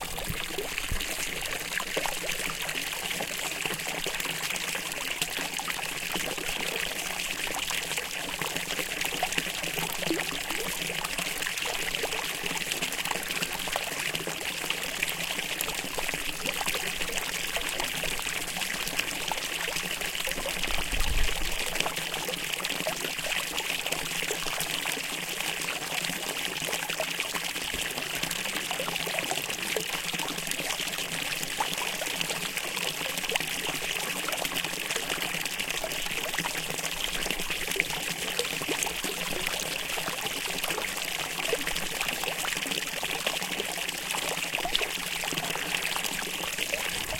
mountain fountain
a fountain at 1700 mt above the sea level, in Majella national Park, Abruzzo, Italy. Where goats and sheeps can have a rest and drink very fresh water.
field-recording, fountain, mountain, trough, water, watering-place